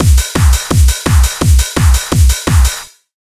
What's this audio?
Rhythm 2 170BPM
With Kick Drum. Hardcore 4 x 4 rhythm for use in most bouncy hardcore dance music styles such as UK Hardcore and Happy Hardcore
rhythm, 170bpm, hardcore, rave